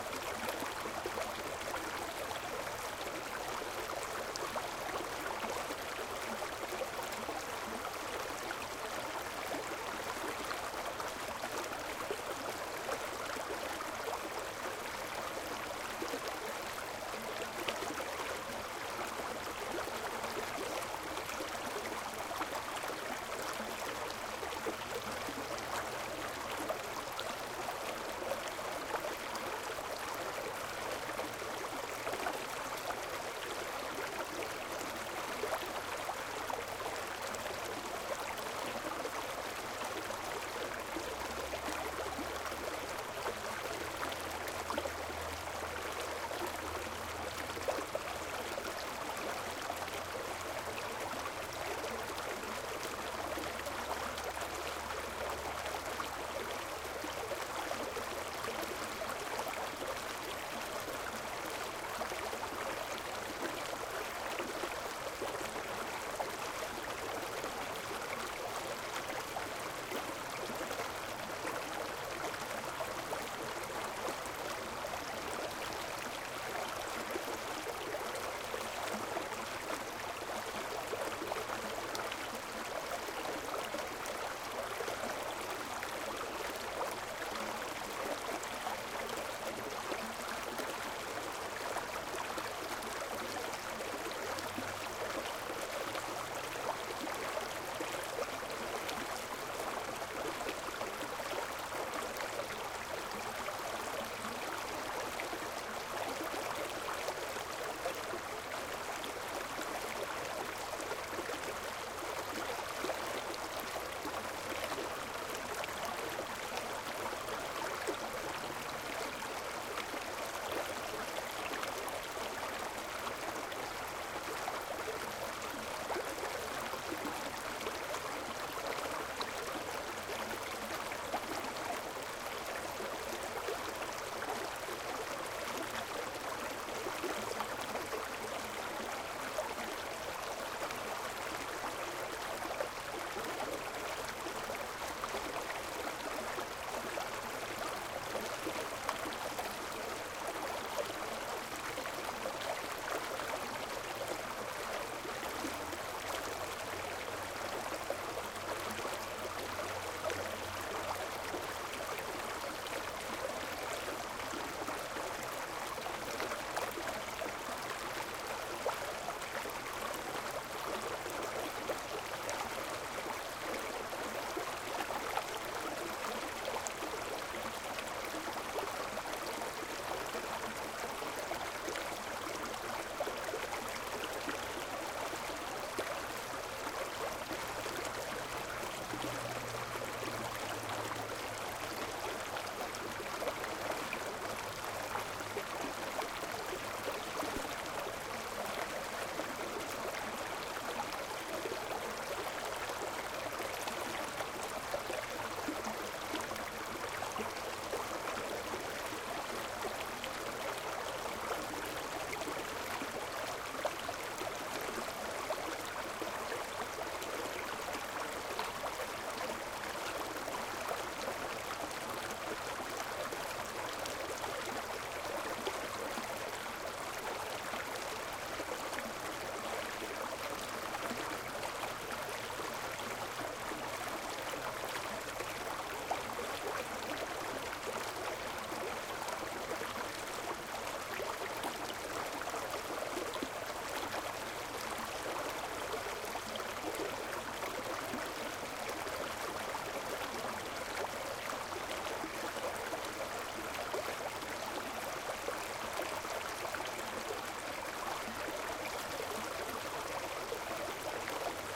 Babbling Brook #01
Field recording of Bolin Creek in Carrboro, NC. Recorded in the early morning. Very peaceful. Needed no treatment at all!